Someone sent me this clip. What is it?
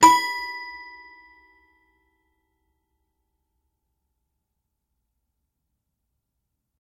srhoenhut mfp E
A single note played on a Srhoenhut My First Piano. The sample name will let you know the note being played. Recorded with a Sennheiser 8060 into a modified Marantz PMD661.
children, funny, my-first-piano, note, one-shot, piano, sample, srhoenhut, toy